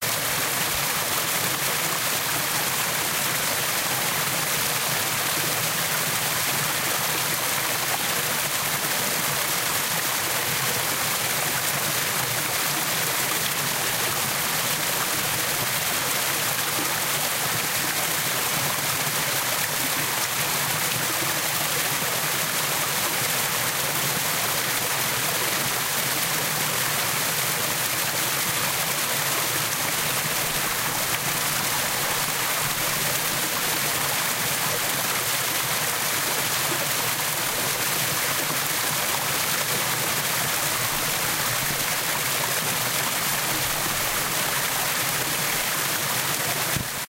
Recorded on a sunny day in southern Arizona hillside near Box Creek Cayon using a ZOOM 2